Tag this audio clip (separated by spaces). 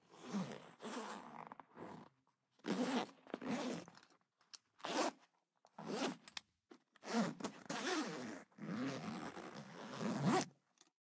5naudio17 backpack bag open school uam zipper